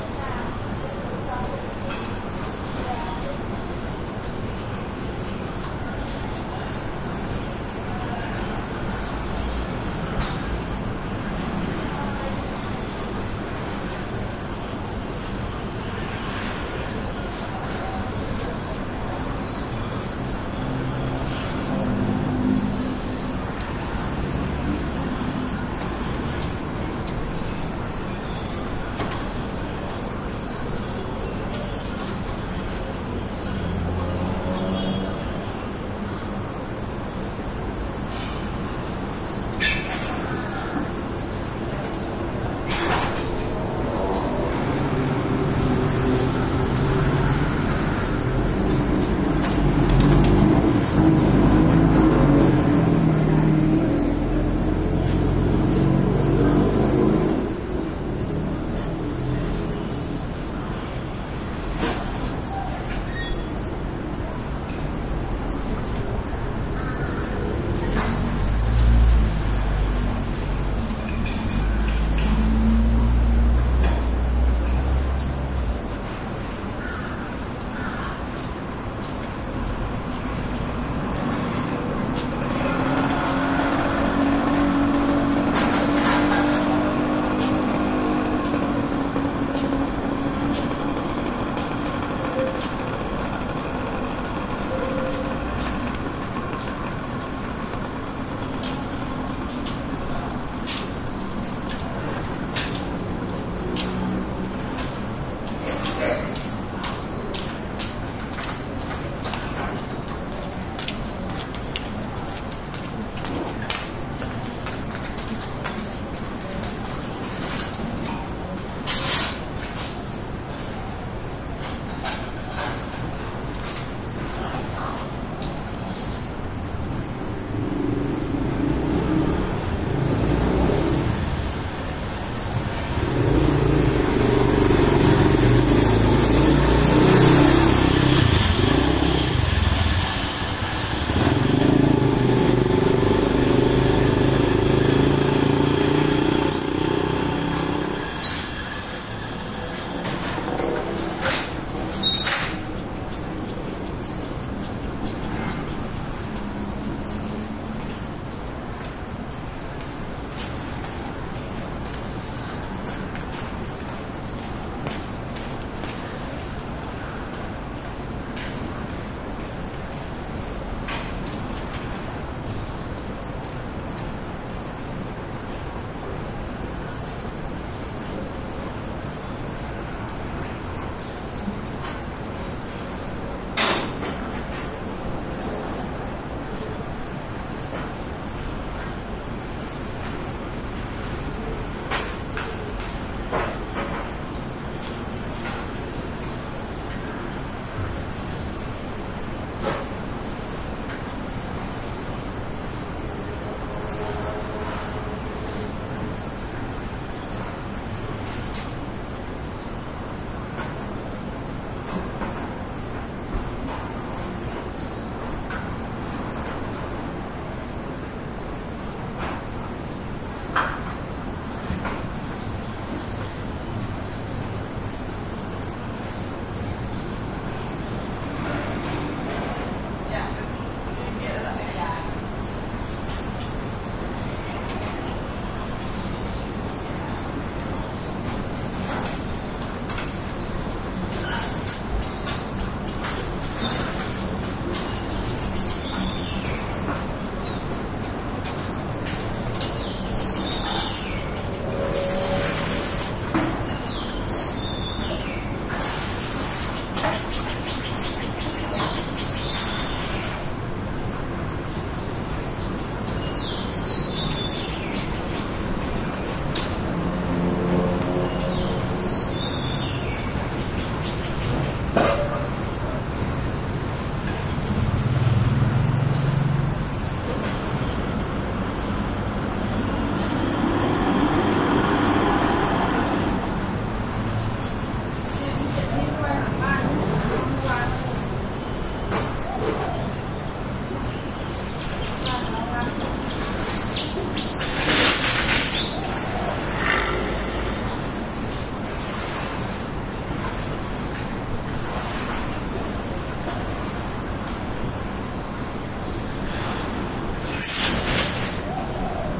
Thailand Bangkok side street life traffic nearby heavy echo passing motorcycles
motorcycles, side, traffic, Thailand, field-recording, echo, Bangkok, street